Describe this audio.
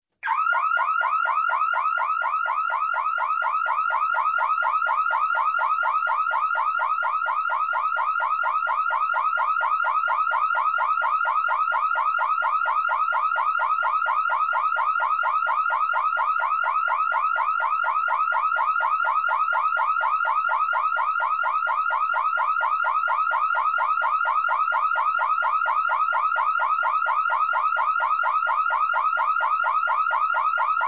Alarm Car or Home
Alarm, Car, Noise, danger, Home, siren, warning, emergency